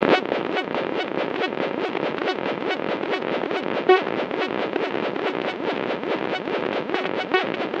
Explor3r Modulated Extreme9 Filtered2
Here I have used a low pass combined with a high pass filter to exclude the top and bottom frequencies.This kind of processing works well for sounds produced by Frequency of Phase Modulation. The resulting sound is less tiring because it lacks the booming bass and shrieking high frequencies.
chaos, chaotic, electronic, explor3r, filter, filtered, modulated, noise, vst